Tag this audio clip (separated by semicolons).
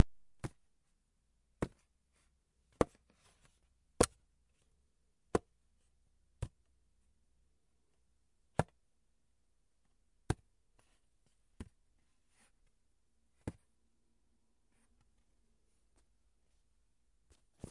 clipboard; hit; slap